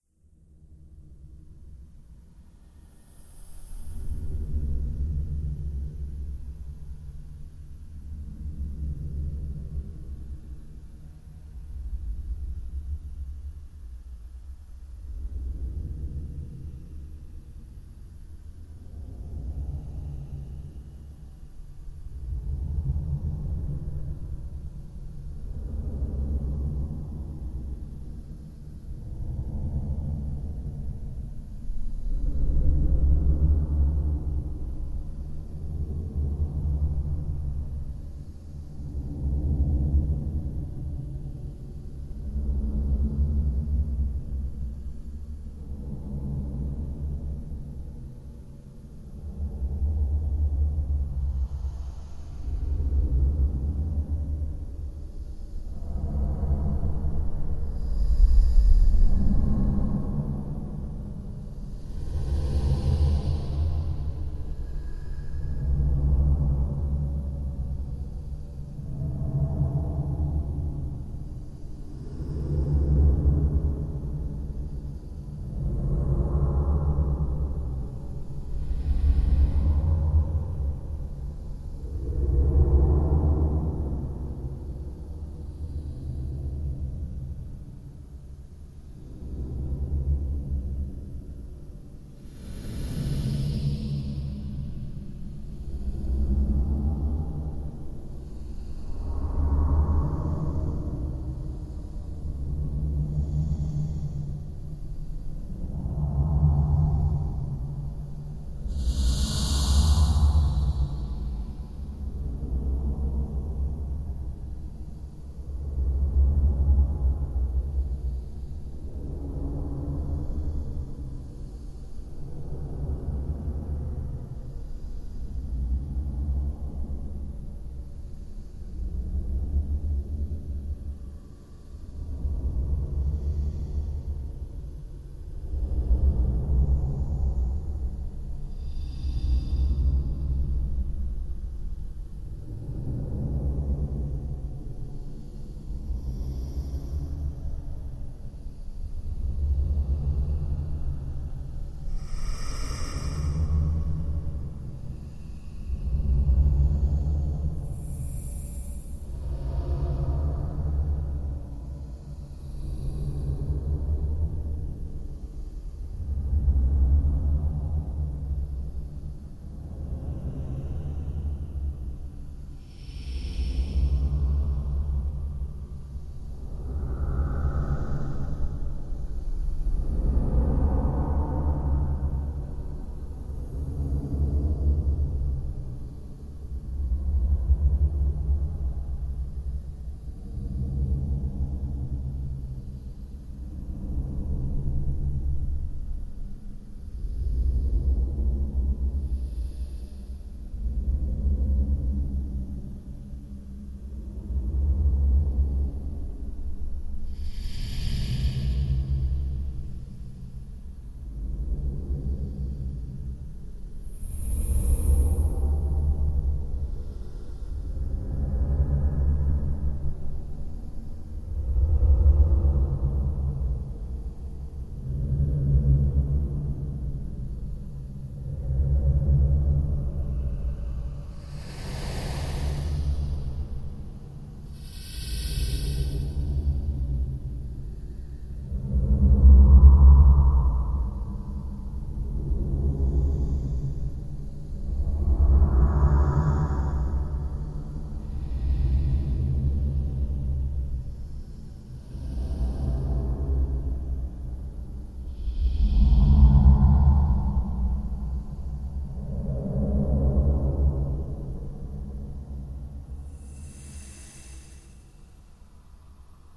Tense creepy atmosphere - underground
Tense and creepy atmosphere in an cave like environment with a recurring breathing that gets each time more intense.
Atmosphere, Creepy, Horror, Spooky, Tense, Underground